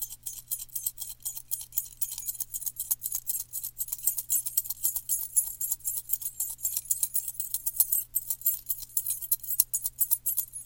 jingling car keys in a hand